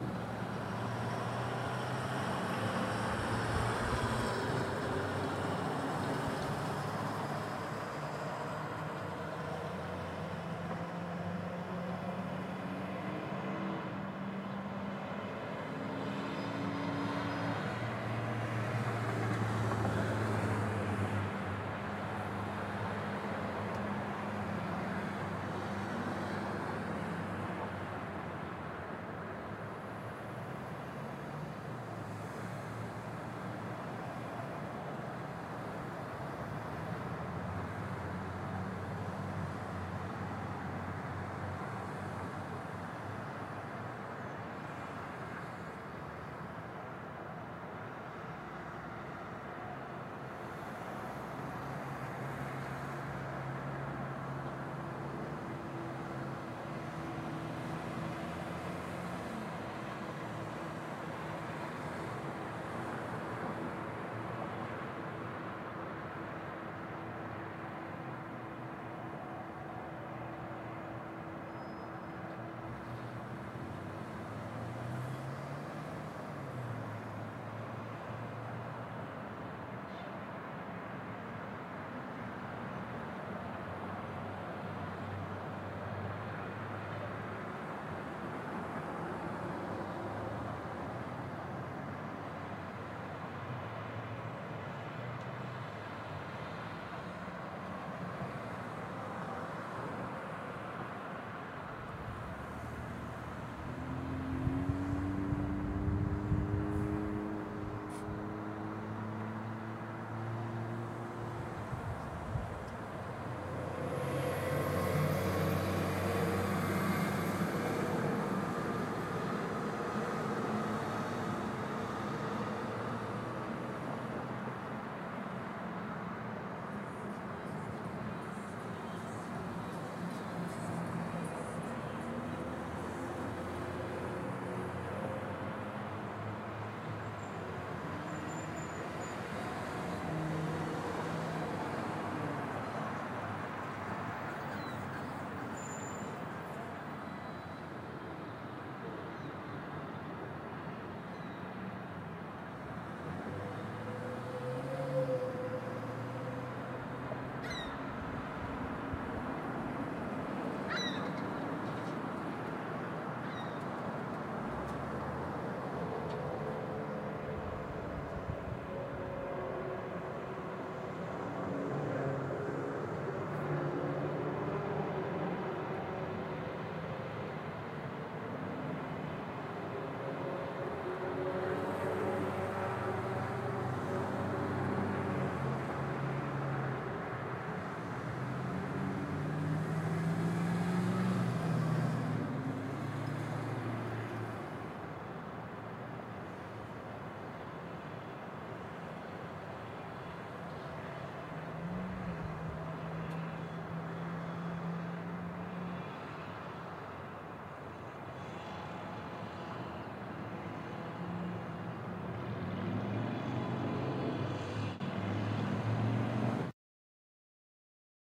small town traffic, ambience